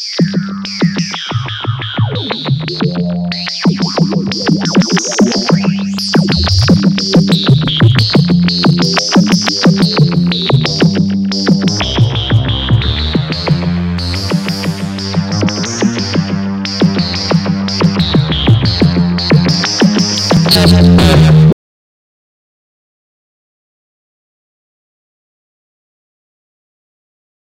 Nord Lead 1 Bass 3
Nord Lead 2 - 2nd Dump
background, electro, rythm, ambient, tonal, blip, melody, nord, idm, resonant, bleep, soundscape, bass, backdrop, glitch, dirty